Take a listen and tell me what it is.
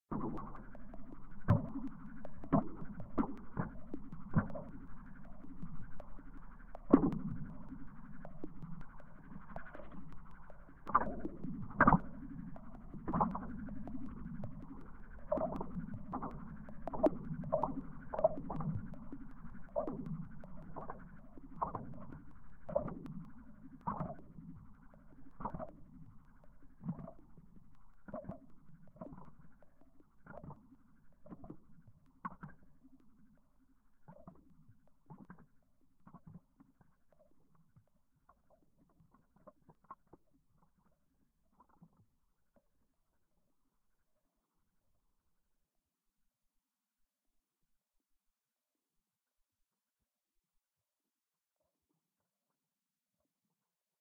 lowercase minimalism quiet sounds